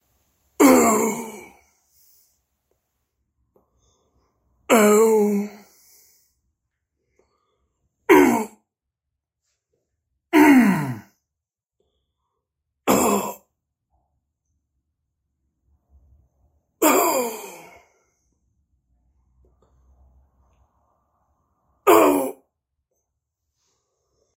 Content warning
Man dies diffrent sound.
pain, painfull, scream